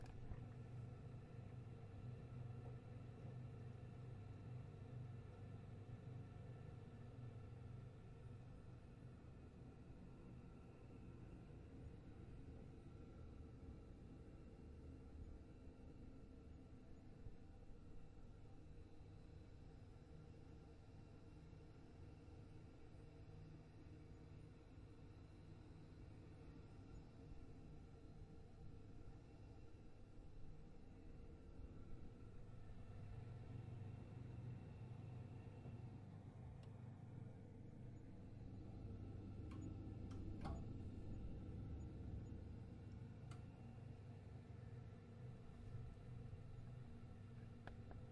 Ambient Droning
Here is a captured sound of a boiler in a neutral state and turning on, near the end of the clip.
I personally used this for the background ambience of a spaceship.
Enjoy
artificial, sci-fi